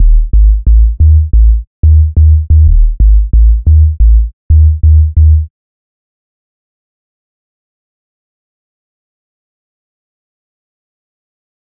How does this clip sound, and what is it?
20 ca bassline
These are a small 20 pack of 175 bpm 808 sub basslines some are low fast but enough mid to pull through in your mix just cut your low end off your breaks or dnb drums.
wobble, bassline, drum, techno, bass, low, loop, electro, sub, trance, dnb, dance, dubstep